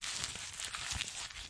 Paper Crumple Craft Sound
Craft, Crumple, Paper